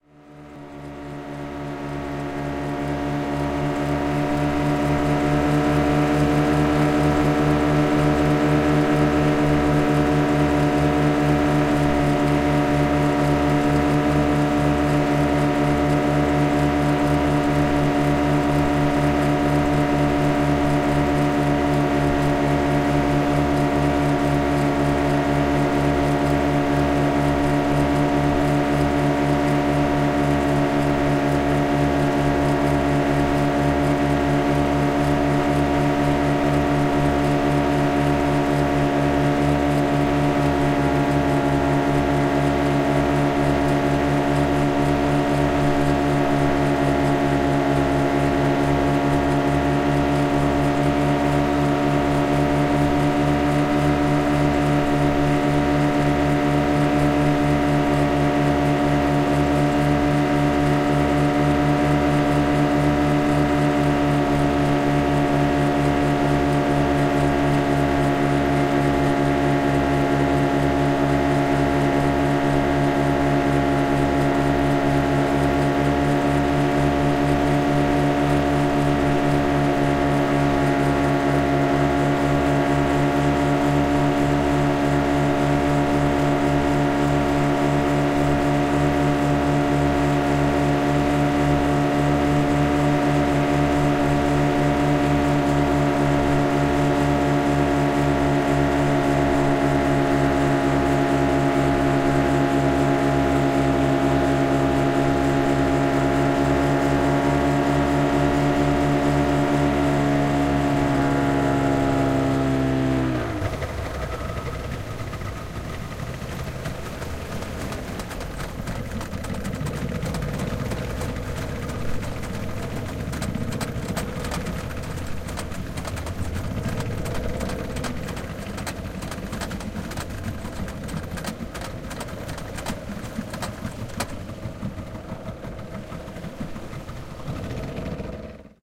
Speedboat Outboard Motors, Tidore, Indonesia
Twin Yamaha outboard motors roar on the public speedboat from Pulau Tidore to Ternate, Spice Islands, Indonesia
diesel, harbor, shaft, tropical, engine, boat, Asia, transportation, rpm, propeller, Ternate, speedboat, ferry, ocean, ship, Yamaha, carrier, vessel, twin, port, field-recording, speed, Tidore, outboard